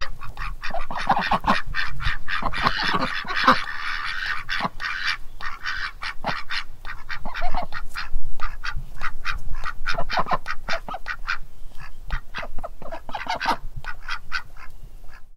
Waddling of Ducks
A small waddling of cayuga drakes and hens honking and dweeting